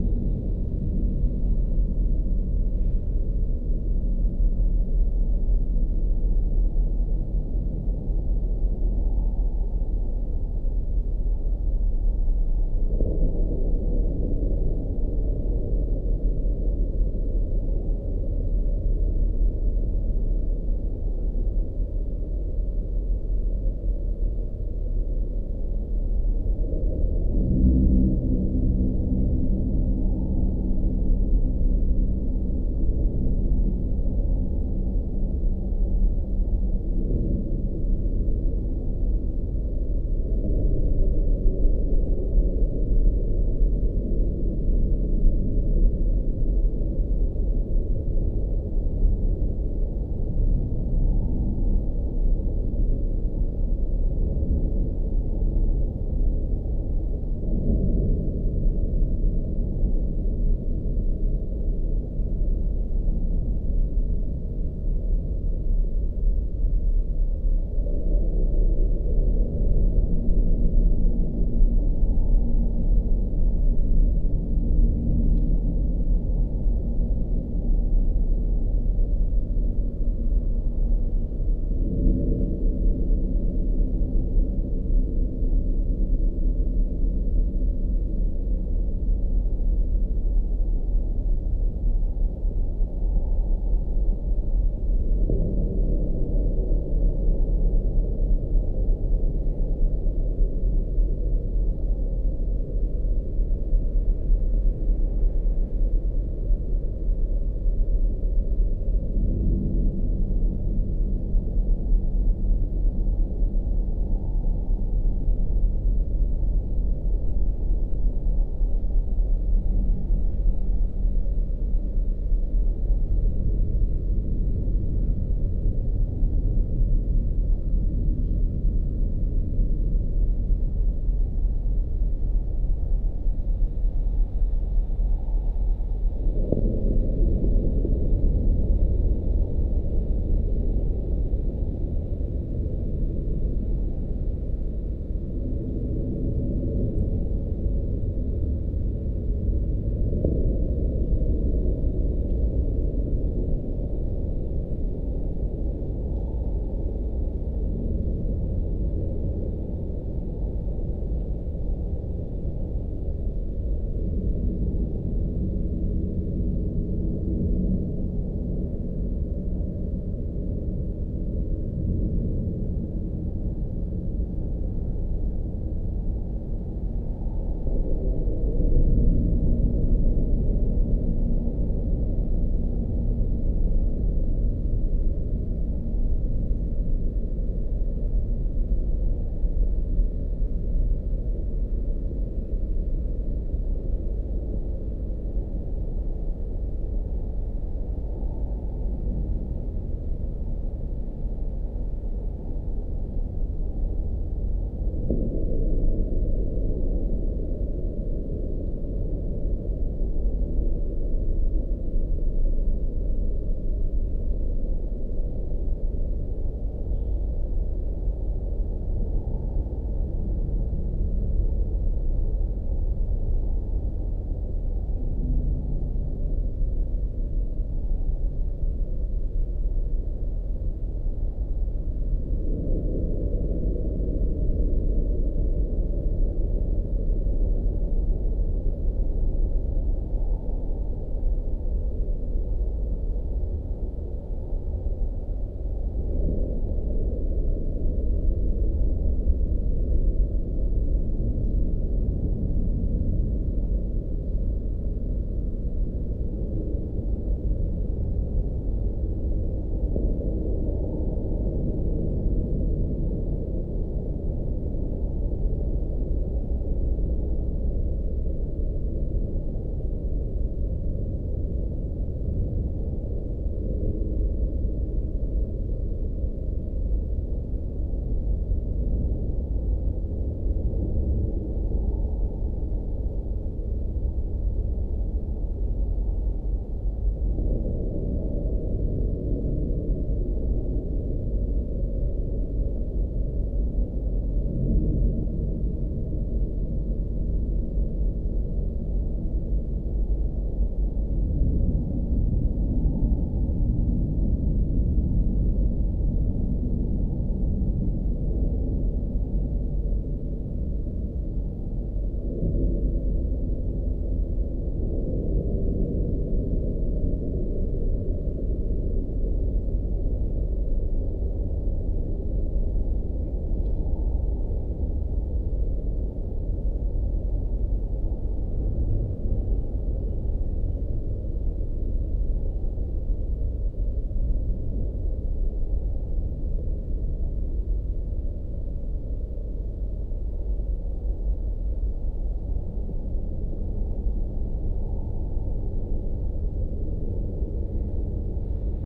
ambience "aftermath" (sfx)
ambient track that was created by mixing a pitched down music box with night-time ambience I've recorded in the city.
EM172-> ULN-2-> DSP.
bass, ambience, sinister, ambient, drone, suspense, atmosphere, anxious, aftermath, dark